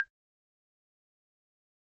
percussion sound in Dminor scale,...
itz my first try to contribute, hope itz alright :)
phone, africa